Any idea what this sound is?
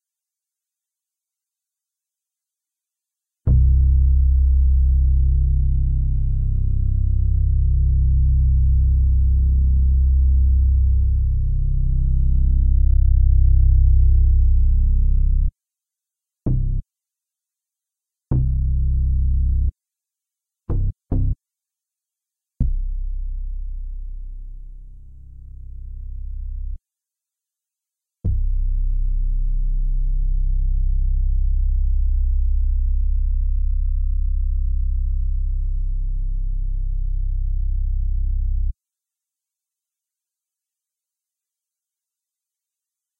korg ms 20 baff base
Plain C bass with a Korg MS 20
ms, korg